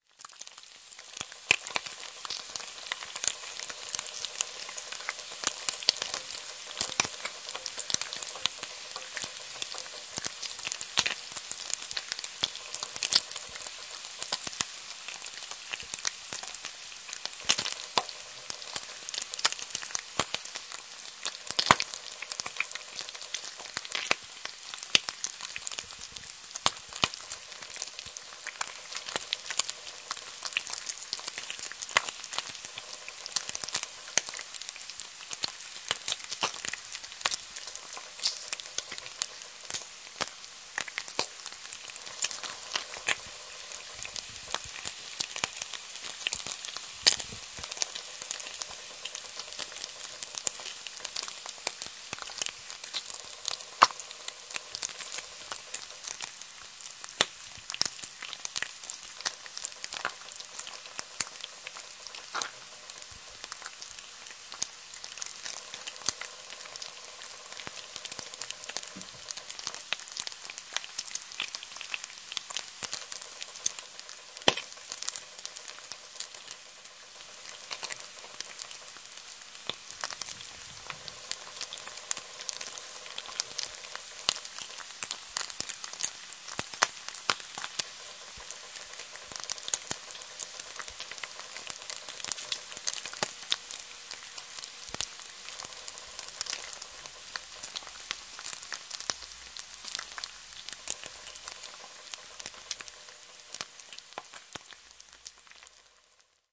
A wonderful track of delicious bacon frying. Recorded with the Zoom H4-N using the internal stereo microphones. Oh, yes, the bacon went real well with the scrambled eggs that I cooked in the bacon grease -yum.
Enjoy
bacon-frying, bacon, trying, sizzle, hot, summer